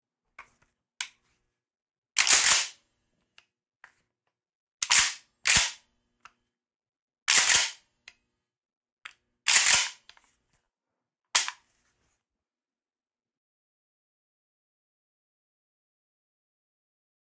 mossberg .12 gauge pump
pumping mossberg500 .12 gauge pistol grip pump
chambering, reload, sounds